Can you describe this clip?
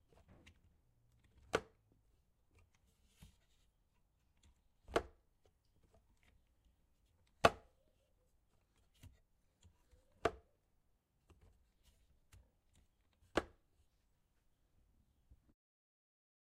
Wooden box lid opening and closing: wood on wood, slight percussion. soft impact, soft percussion. Recorded with Zoom H4n recorder on an afternoon in Centurion South Africa, and was recorded as part of a Sound Design project for College. A wooden box was used, and was created by opening and closing the lid.
owi, opening, closing, box, lid, wood, open, wooden-box, wooden, close